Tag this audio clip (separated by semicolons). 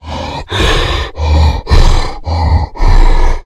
deep; gaming; game; videogames; Voices; indiegamedev; arcade; male; sfx; troll; voice; videogame; games; vocal; gamedev; Talk; RPG; brute; low-pitch; fantasy; gamedeveloping; monster; Speak; indiedev; Orc